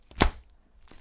Meat Slap 1
Sloppy mincemeat in the showerroom where I torture out of date meat products...
A straight forward, very slappy sound.
beating, bloody, slap, meat, guts